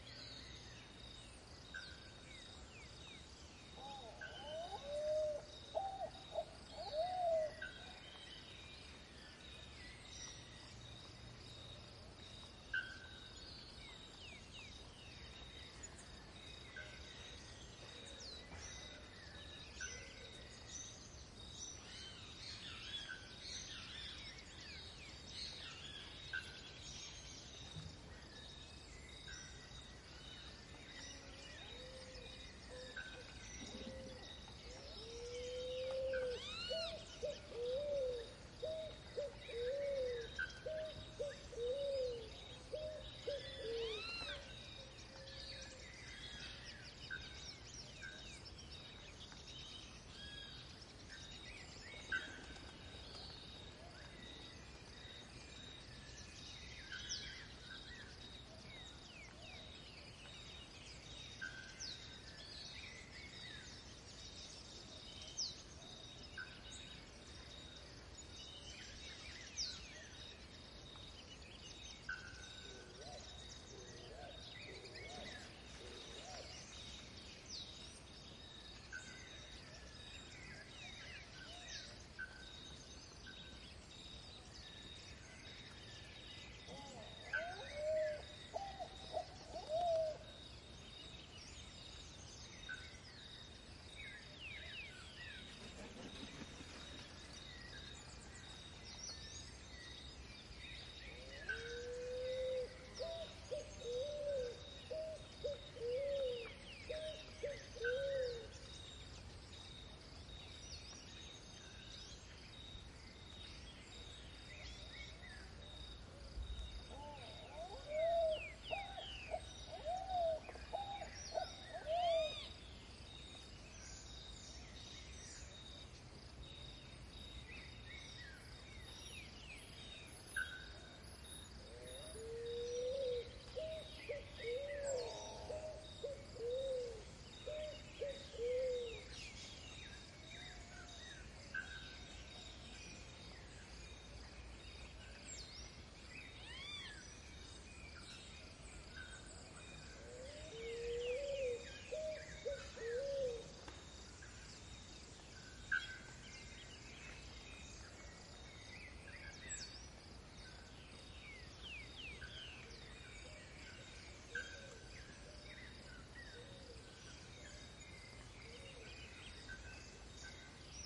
Calm atmosphere with turtledoves and crickets recorded in early-morning in Atlantic forest during winter in Brazil (Serrinha do Alambari)recorded with ORTF Schoeps microphones in Sound-devices Mixpre-6